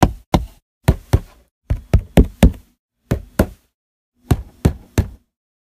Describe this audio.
hit-tap-strike-cardboard-box-13-times
10.25.16: Thirteen strikes with my hand on an empty cardboard box (formerly a 12-pack of soda)
box, recording, wood